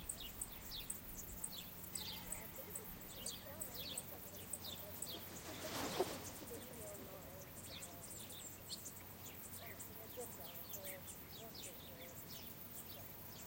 Pag Starigrad sheep crickets birds ppl
the soundscape from Old town called Starigrad near town Pag
birds, crickets